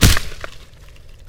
A sample that was made completely by accident. I was recording outside my local park and trying to capture the bird calls (with a semi-successful effort - too much motorway noise is a bit of a bummer) one morning and aimed my Uchó Pro at the ground as I stood on a sludgey leaves and wet sticks with the input too high - overdriving it but also somehow making a gore sound effect. When I imported it to REAPER, time-shifting it - I spotted the unique flavor of crunchiness and wetness it gave.